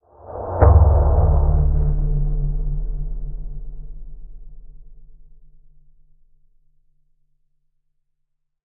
Gritty lo-fi explosion
Explosion sounding pretty lo-fi after being pitched down.
bass, boom, explosion, gritty, noise, processed, rumble, sound-fx